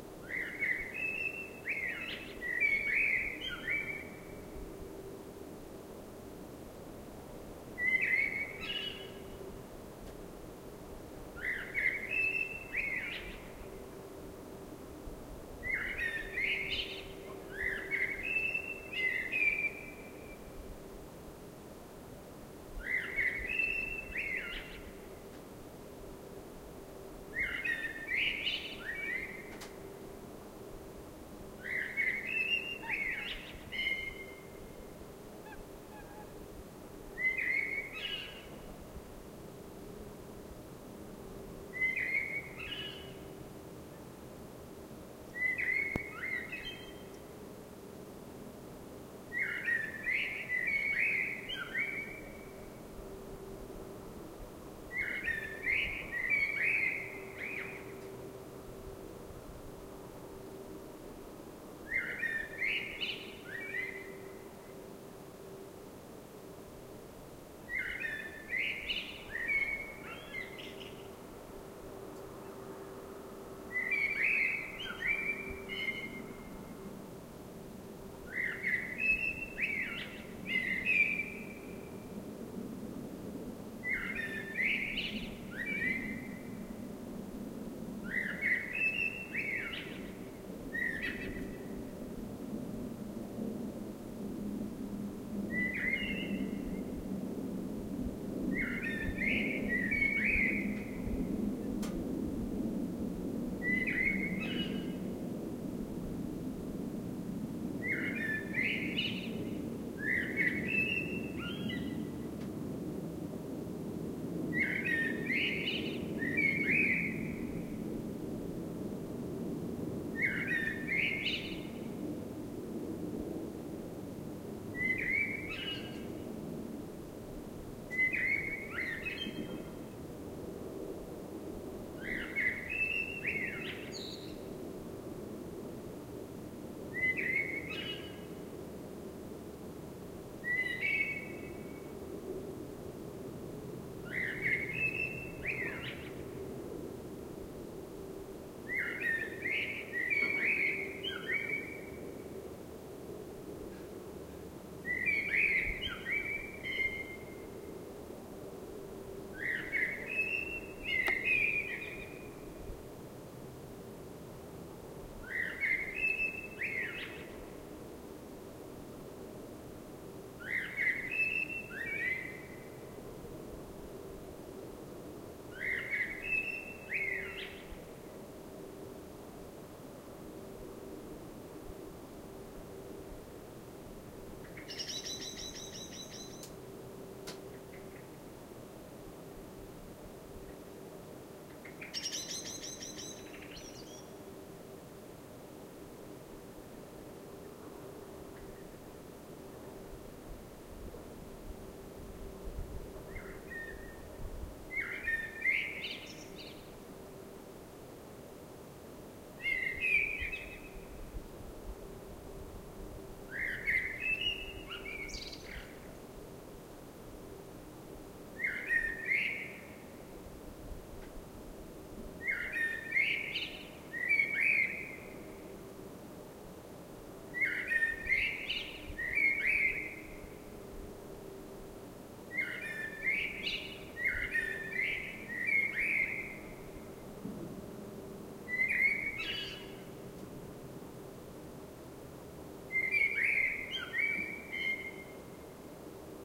Blackbird Sweden long
A blackbird singing at night. Long version. Recorded in Sweden during springtime.
bird, birds, blackbird, koltrast, night, singing, song, Sweden